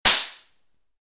Sound of a toy gun;
Microphone: Huawei Honour U8860 (Smartphone);
Recorder-App: miidio Recorder;
File-Size: 28.5 KB;